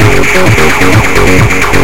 FLoWerS 130bpm Oddity Loop 005
Another high-resonance techno loop. Only minor editing in Audacity (ie. normalize, remove noise, compress).
electro, loop, resonance, dance, techno, experimental, trance